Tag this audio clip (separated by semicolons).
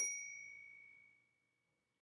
bell; phone; Telephone